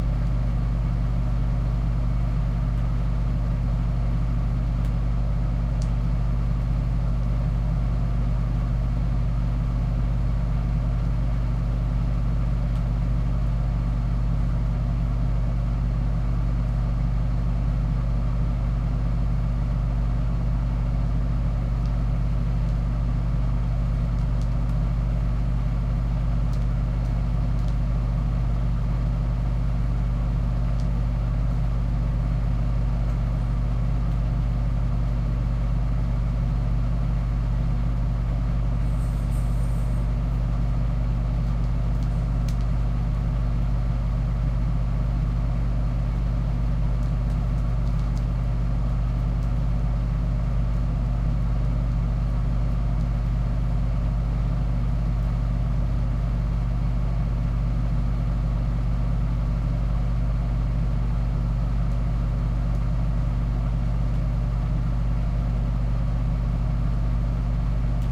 Recorded my small space heater with a blue yeti in a reverby room cut it in audacity to loop better